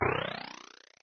This sound is created from Letter T said by Machac of EPOS text to speech engine by delay:
Delay level per echo: -1,0 dB
Delay time: 0,1
Pitch change effect: pitch/tempo
Pitch change per echo: 1,06%
Number of echoes: 30;
and Pitch speed -75% in Audacity.